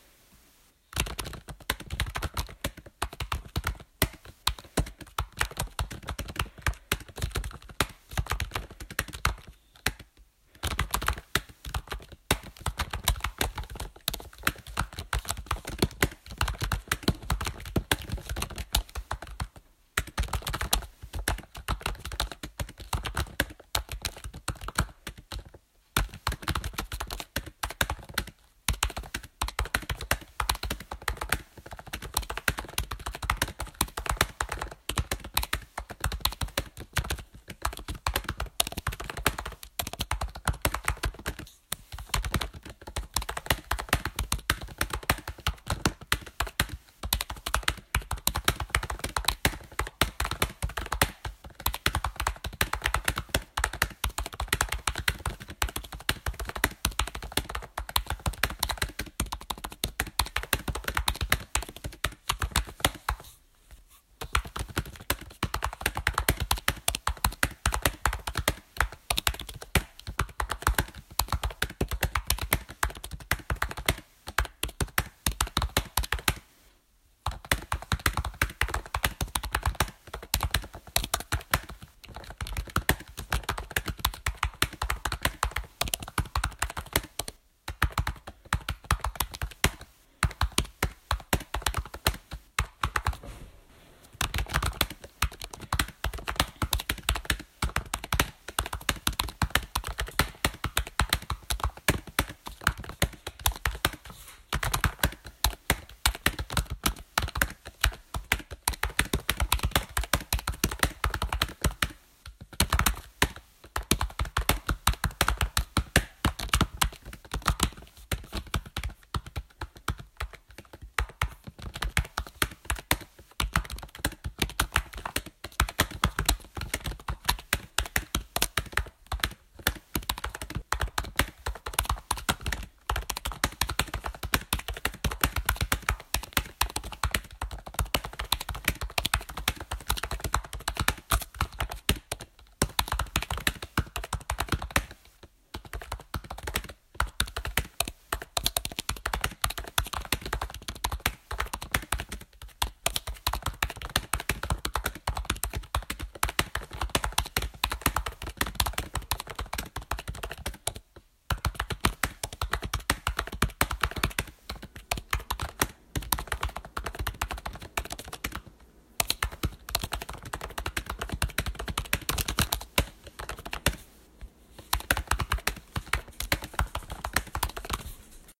Keyboard Typing
Sounds of typing on a keyboard
laptop, office, typing, computer, PC, MacBook, keystroke, keyboard, keyboard-typing